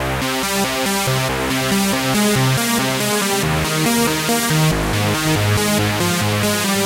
Prophets Last Words
strings, hardcore, 120-bpm, sequence, kickdrum, progression, beat, drum, bass, distorted, phase, pad, hard, distortion, trance, techno, drumloop, synth, kick, melody